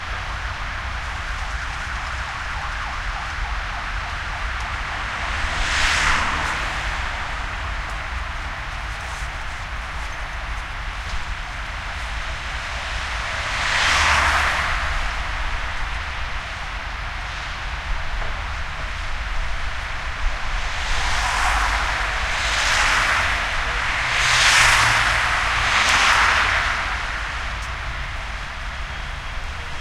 JM Recoletos (coches)
City sound of "Paseo de Recoletos" street, at Madrid (Spain). The cars are passing by.
Used: Sony portable MD, Aiwa stereo mic.